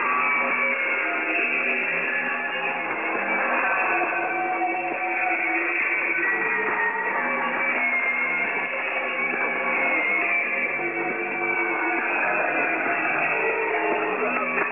freaky synthish
Some zappy synth music from Twente University's online radio receiver (shortwave). Pretty creepy. Play it at midnight.
creepy, electronic, melody, noise, radio, shortwave, synth, weird, zap